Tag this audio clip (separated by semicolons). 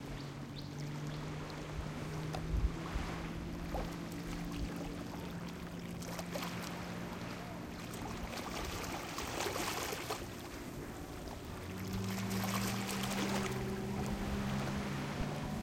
Splash; Waves; Water